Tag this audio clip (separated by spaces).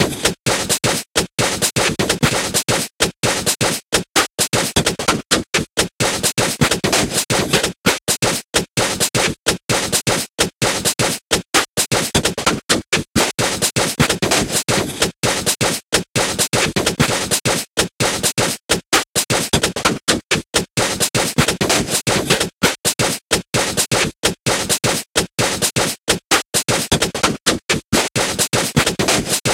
Heavy Loop Top